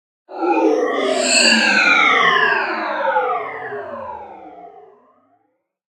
CP Power Down02 light
Powering down your futuristic vehicle... or robot? I dunno. This one has less low freq. than the others.
Down, sci-fi, MOTOR